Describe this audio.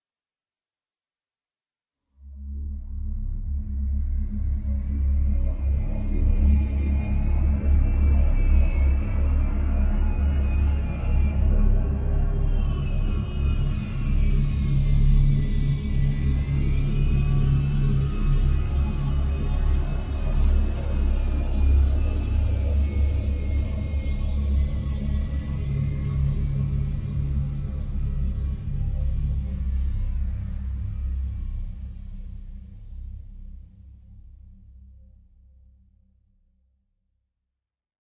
The Pitch bender on my synth stopped working right so I decided to make some recordings with the broken wheel. PBM stands for "Pitch Bender Malfunction" and the last number in each title is the BPM for timing purposes. Thanks and enjoy.
Drones, Experimental, Synth